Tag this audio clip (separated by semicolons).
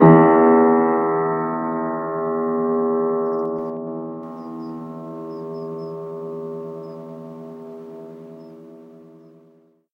complete; keys; notes; old; piano; reverb; sustain